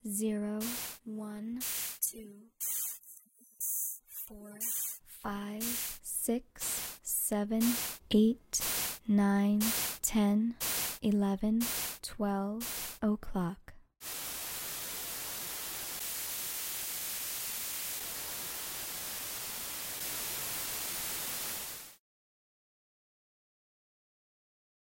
4th-order Ambisonics Clock Test (voice + white noise)
This is a synthesised 4th order Ambisonics test file. The exchange format is: SN3D normalisation with ACN channel order.
A recorded voice says each hour clockwise in the respective positions as if the listener is located in the center of a huge horizontal clock, and looking at the location of the hour number 12. A white noise follows each spoken word, and four additional noise signals are played in four positions near to the top of the sphere.
This test audio uses sounds from the pack "Numbers 0-20" by tim.kahn
This test audio was generated using Ambiscaper by andresperezlopez
3D, 3D-sound, Ambisonic, Ambisonics, Check, Clock, HOA, Noise, Processed, Spatial, Test, Testing, Voice